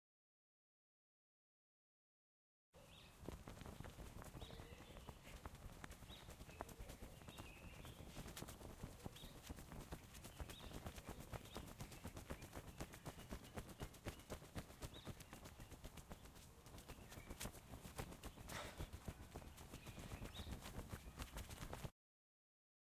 Friend fanning himself with his shirt: Flapping, fanning, wind, clothes flapping and male sigh. Recorded with a zoom H6 recorder/ microphone on stereo. Recorded in South Africa Centurion Southdowns estate. This was recorded for my college sound assignment. Many of my sounds involve nature.